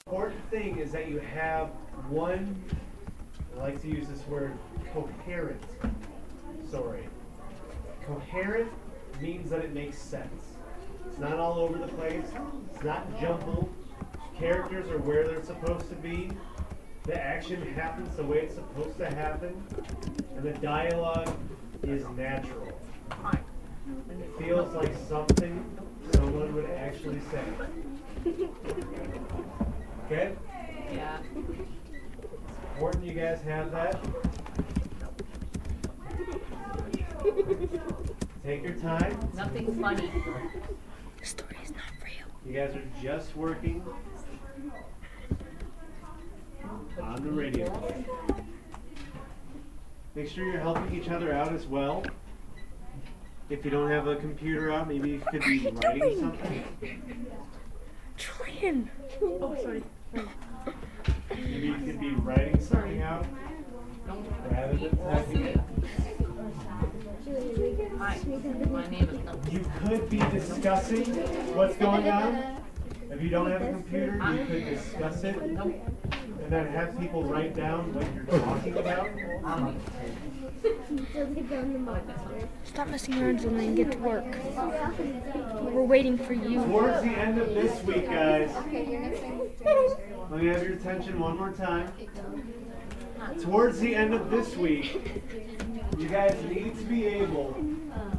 It is about people laughing and talking